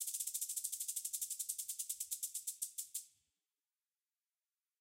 Various shaker sounds being used to make this snake rattle effect (made in Ableton)
-Julo-